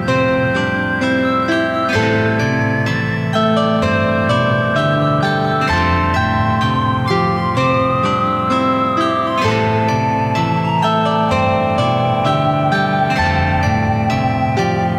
Loop 2- Valley of Moons
A Loop created from my piece-